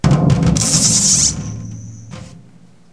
Sounds made by throwing to magnets together onto drums and in the air. Magnets thrown onto a tom tom, conga, djembe, bongos, and in to the air against themselves.